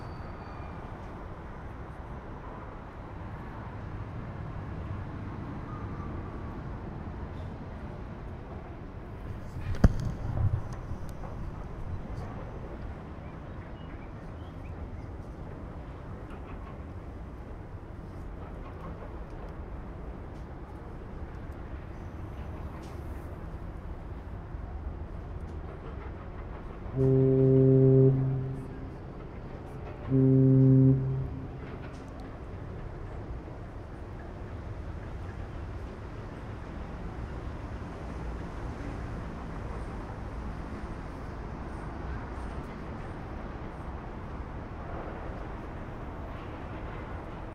BI71 CORNE DE BRUME.R
AMBIANCE PORT DE LISBONNE, PORTUGAL
AVEC CORNE DE BRUME
Siren of boat, foghorn
RIGHT of STEREO ORTF
amb, Lisbonne, port